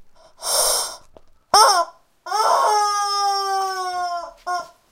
rubber chicken08
A toy rubber chicken